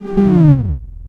Short button sound. Recorded, mixed and mastered in cAve studio, Plzen, 2002
ambient, hi-tech, button, press, synthetic, click, short, switch